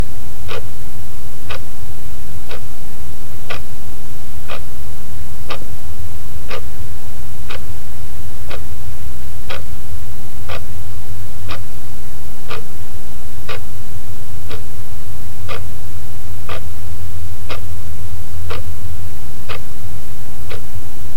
The in-dash clock on a Mercedes-Benz 190E, shot with a Rode NTG-2 from 2" away.
mercedes, interior, tta, clock, rode, field-recording, car, zoom, benz